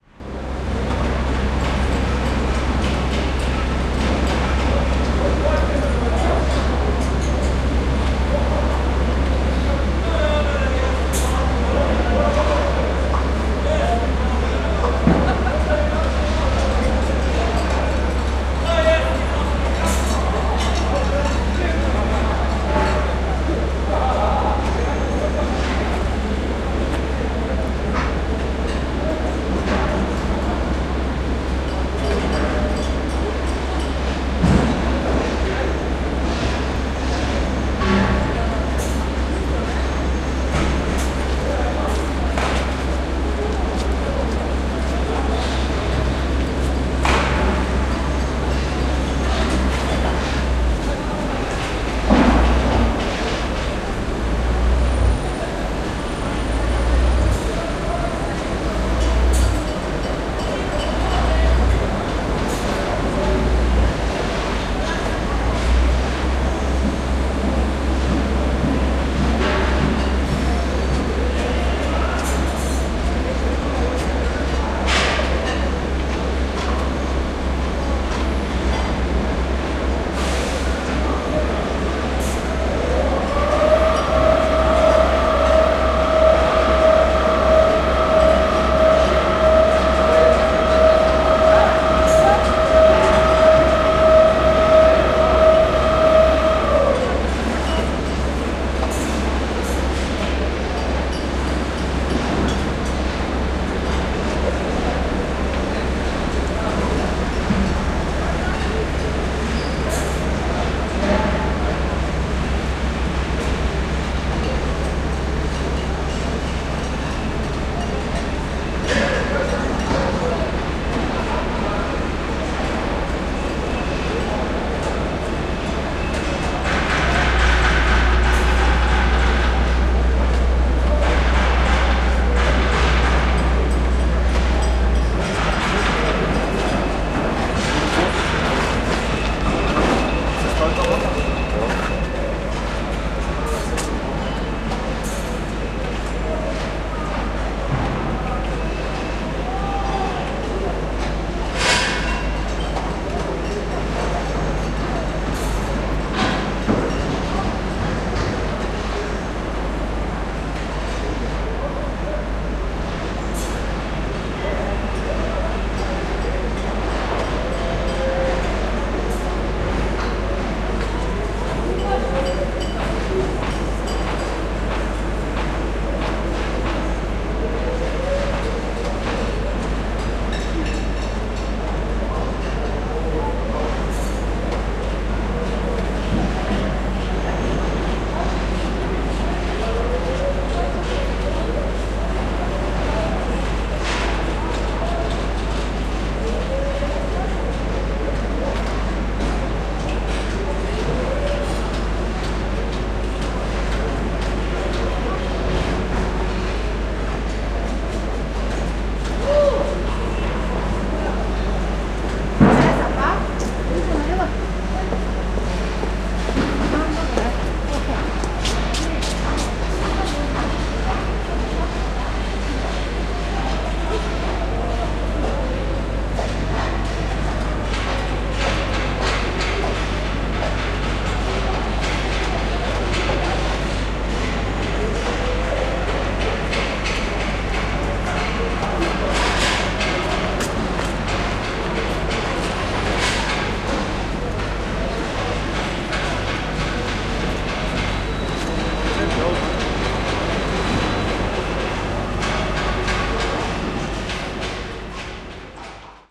Many noises from the construction site.